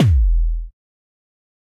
trance kick02
Produced with Jeskola Buzz by mixing kick synthesizer and filtered noise.
bass-drum, bassdrum, drum, kick